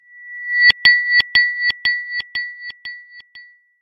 Recording of a glass's tinkle. Duplicate the sound, reverse it, and put it before the original one. Add a delay effect with 5 echoes.
delay, record, tinkle